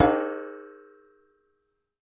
Plat mŽtallique 3
household, percussion